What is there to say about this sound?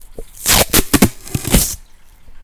duct tape being pulled